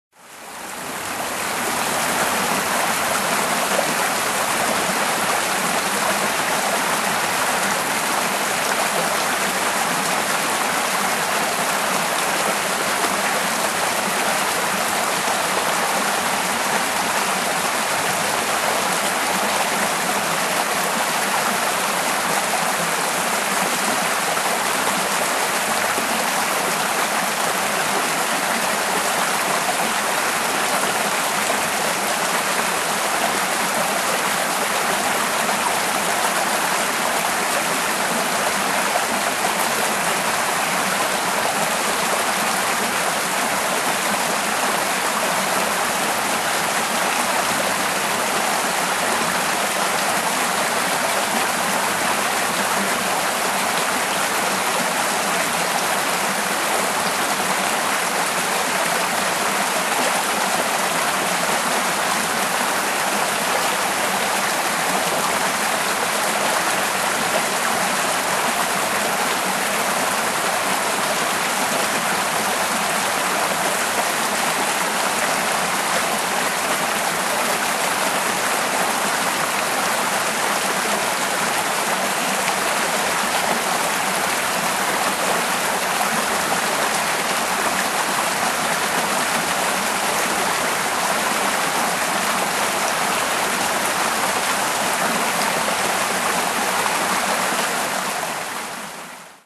Gurgling Bubbling Water
Small canal waterfall being very busy on the day of the recording. Made with CanonLegria camcorder.
bubbles, CanonLegria, water, waterfall